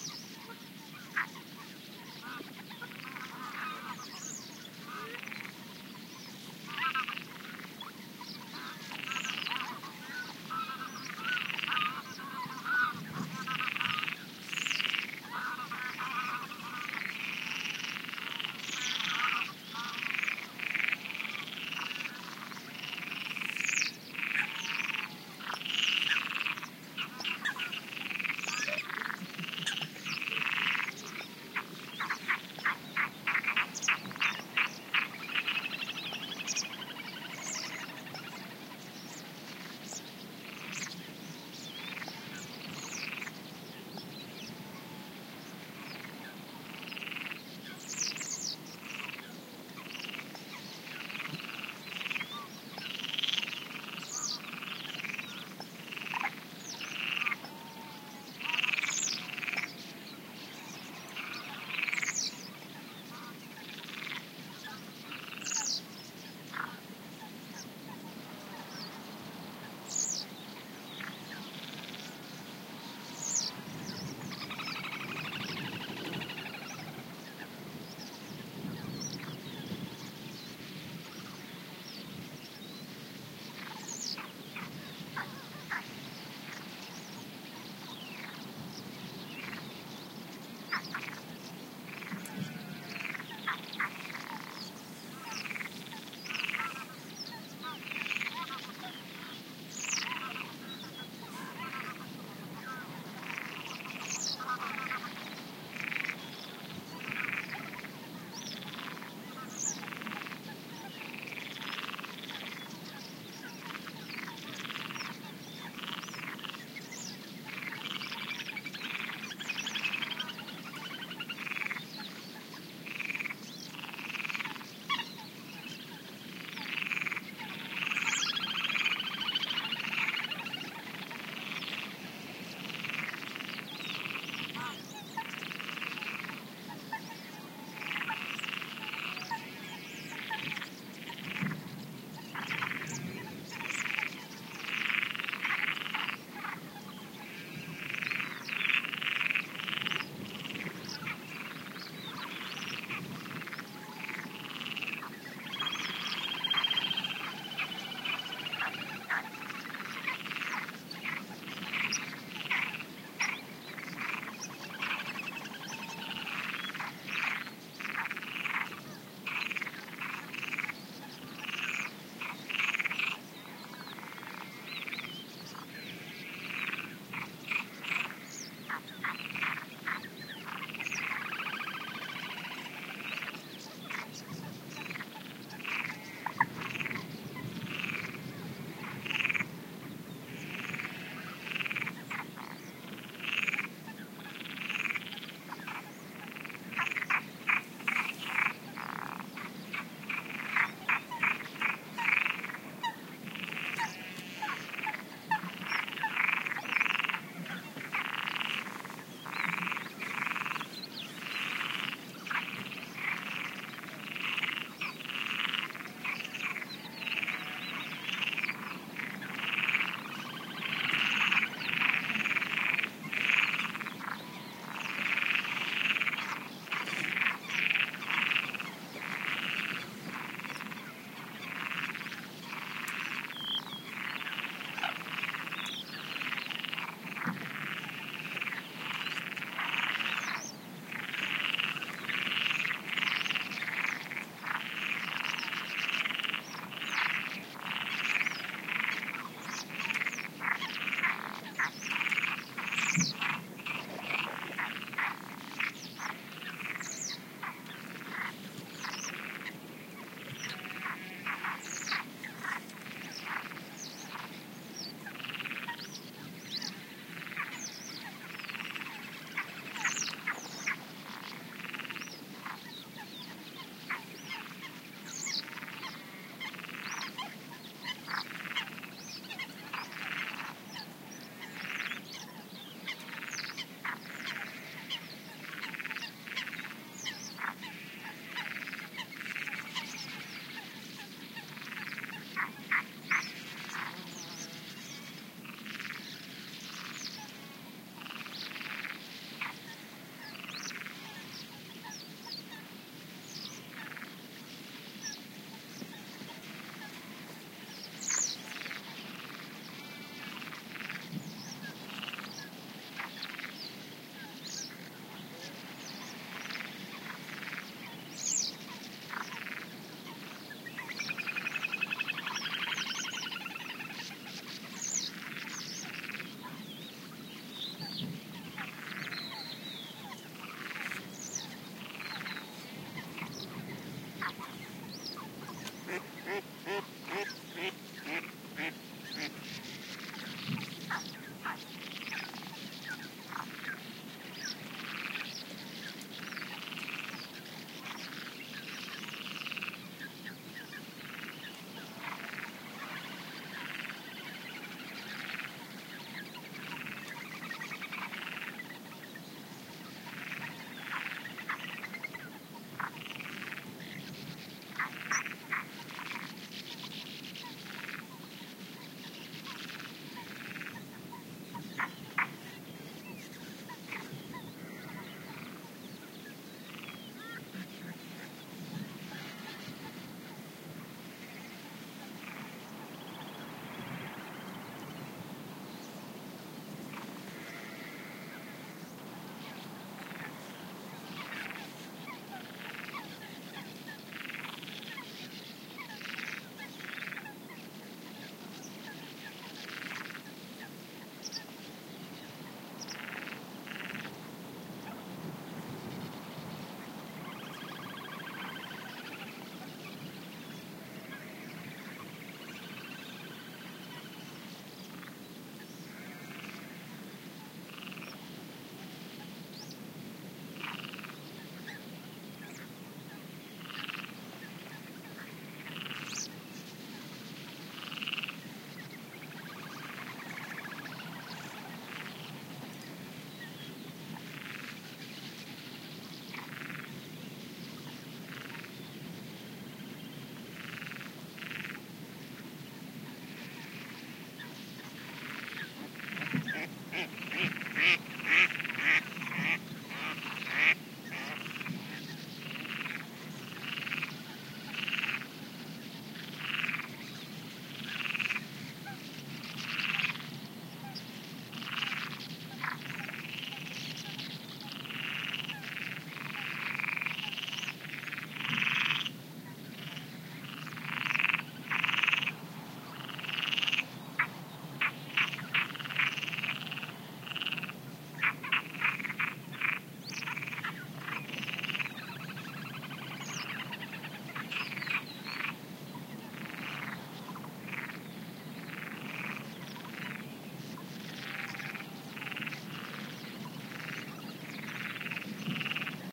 varied marsh ambiance with frog calls, Coot calling, Mallard, Sand-Martin, sheep...
nature, spring, marshes, south-spain, birds, ambiance, field-recording